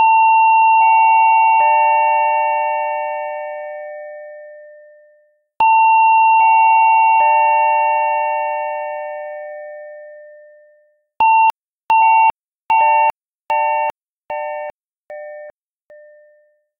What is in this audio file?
pedestrian crossing alicante 4
Clear sound of the acoustic signal from some crossing pedestrians in the city center of Alicante (Spain). Recreated on Audacity from a rescued recording taken on a pedestrian crossing from Calderón de la Barca street.